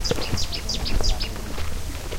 polla d' aigua
The sound of a moorhen, very beatyful, it's amazing. Recorded wiht a Zoom H1 recorder.
water, wind, Deltasona, pratdellobregat, nature, bird, field-recording